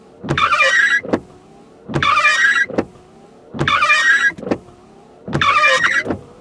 car windshield wipers seamless loop 3 slowest squeakier
New car windshield wiping sounds. Slower variation. Hella squeaky. Seamless loop.
Recorded with Edirol R-1 & Sennheiser ME66.
windshield, shield, wiping, squeek, wipers, window, glass, loop, rubber, squeeky, squeaky, seamless, wind, cleaning, car, rain, squeak